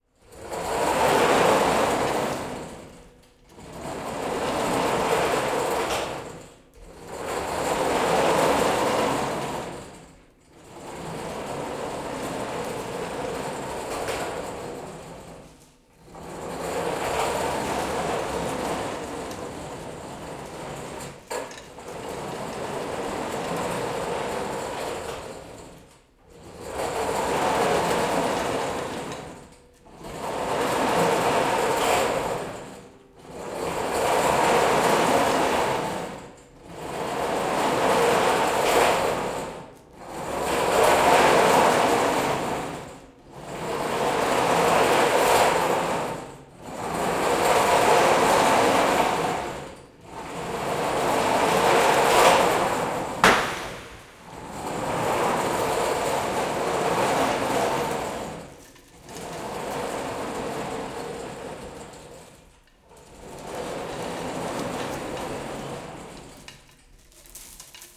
metal shop hoist chains thick rattle pull on track fast2
hoist, metal, chains, shop, track, fast, thick, rattle, pull